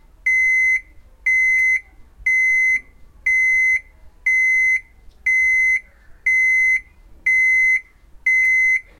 Loud alarm signal from an electronic clock.